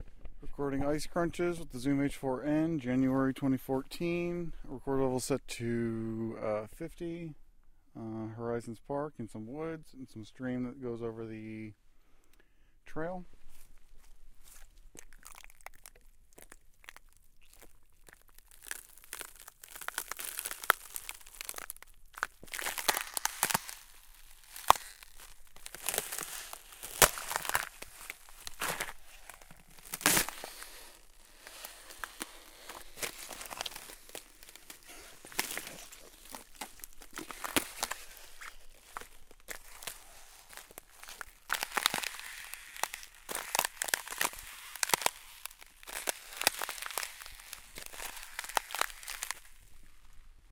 Ice Break Crunch Crack Foott 02
Recording breaking frozen/mostly frozen ice puddles with my foot in January 2014 at Horizon's Park in North Carolina. Mics set to gain 50 and mic position set to 90 degrees.
crack, ice, north-carolina, break, destroy, foot, crunch, footstep